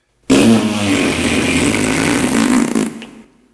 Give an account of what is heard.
Vocalised farting noise #2. Recorded and processed on Audacity 1.3.12